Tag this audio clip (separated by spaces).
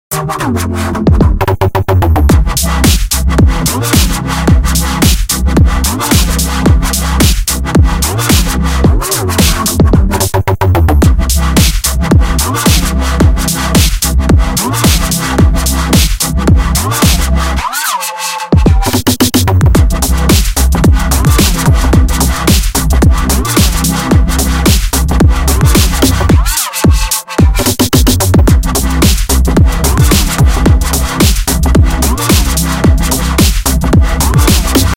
2013 bass bounces dnb filter fruity-loops n pad sample snare